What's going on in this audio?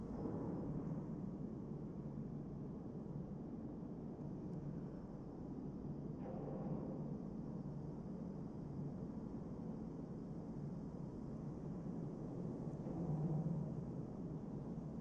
Barrow Guerney Atmosphere
Sound recording from Barrow Gurney Mental Asylum, just south of Bristol.
Edited using Adobe Audition.